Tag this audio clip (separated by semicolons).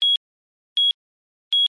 beep; high-pitched